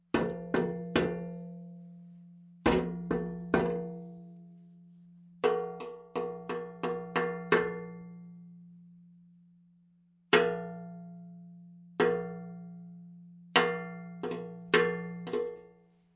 Barrel hits
Recoreded with Zoom H6 XY Mic. Edited in Pro Tools.
Sound of a barrel is being hit.